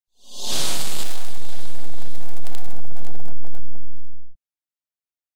Sound reminds me of some kind enemy vanishing after being hit.
started with my voice recording. put in simpler in ableton live with some lfo and filters, played some sequence few notes down. then processed in reaper ( stretched & eq multiple times with other things in process, ReaFir were very handy)
Does not really matter but voice recorded with AKG C1000S, Focusrite Scarlet 2i4. Software: Ableton Live Lite + Reaper.
cracks, vanished, sound-effect